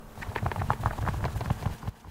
Bird-wings
I needed to make the sound of some birds flying away for a film I did Foley for, and I had read somewhere that leather gloves being flapped sounded a lot like birds flying, so I tried it out and it worked rather well :-) I was pleased :-)
Part of the filmmakers Archive by Dane S Casperson
~Dane Casperson
flutter, wings, flying, beating-wings, flapping, flapping-wings, avians, birds